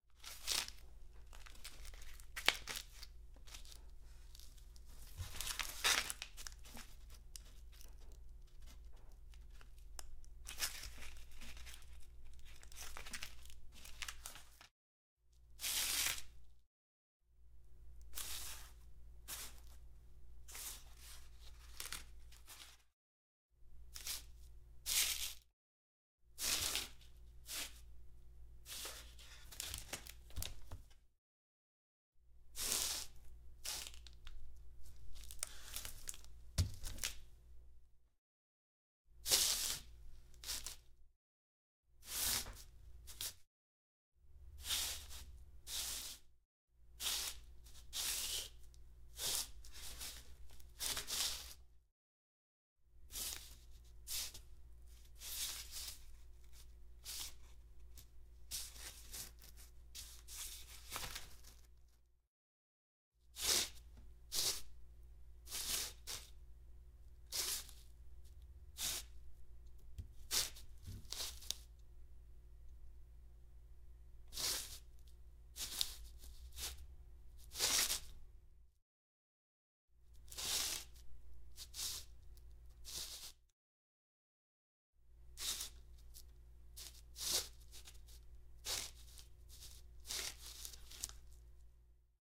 foley paper sheet of white paper slide around on wood various grit rotating paper on surface like while cutting with knife India
paper, India, sheet, slide, surface, knife, cutting, grit, around, foley, white, rotating, while, wood, various